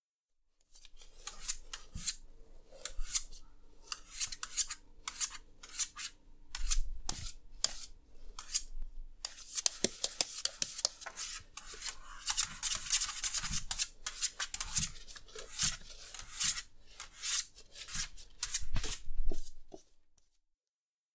Dog scratching on a door